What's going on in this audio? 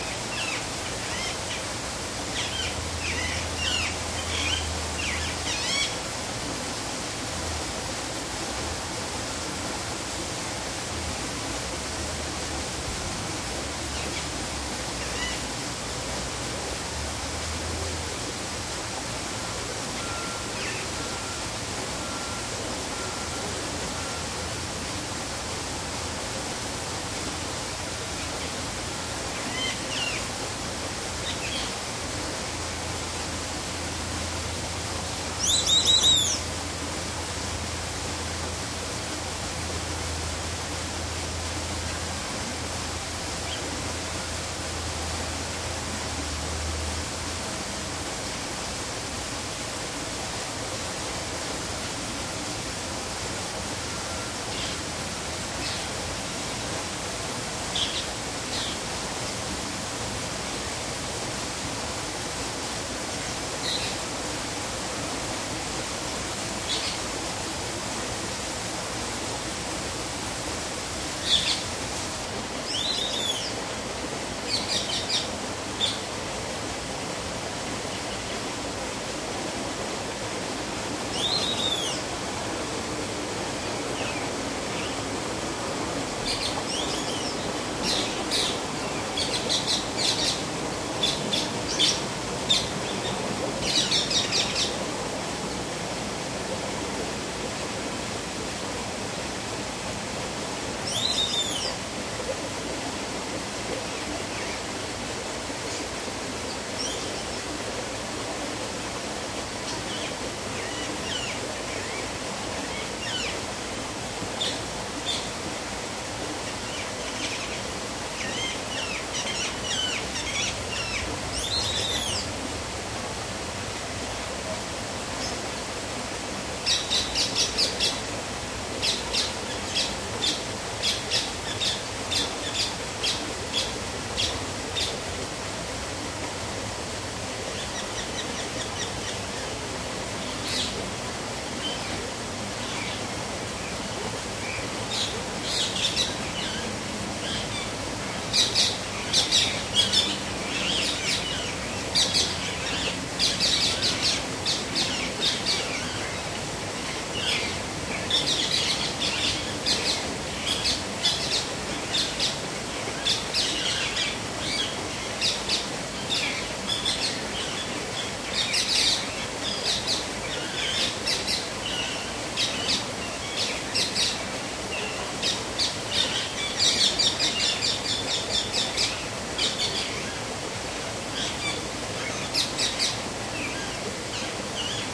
Recorded at the Dallas Zoo. This recording is from inside the African forest aviary. Different parrots and whistling ducks are the main things to be heard as well as a waterfall.